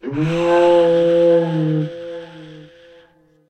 Created entirely in cool edit in response to friendly dragon post using my voice a cat and some processing.
cat, dragon, voice, animal, processed